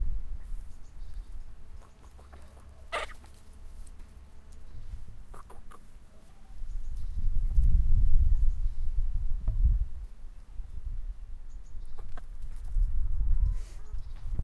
Chicken sounds 1
birds, Chicken